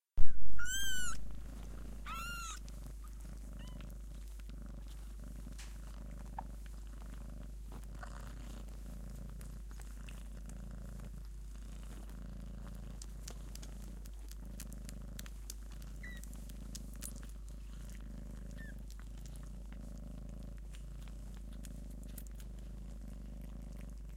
Cat breast feeding kitten and purring
My female cat feeding her babies. Recorded with a Marantz PMD 660 and a couple of Senheiser K6.